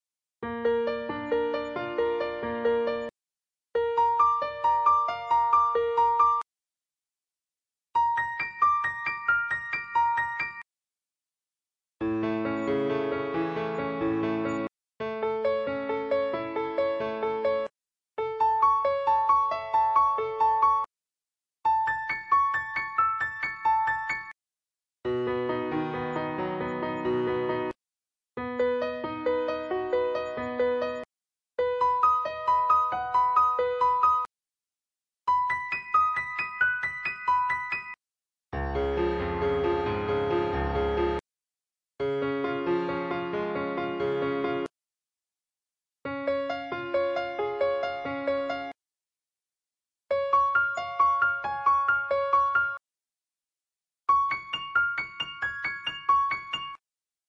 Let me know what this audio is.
piano loop arpeggio giul 2 esempio
piano arpeggio with various keys and octave.
loops, piano, arpeggio